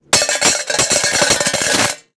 A buch of coins being droped in a metal container.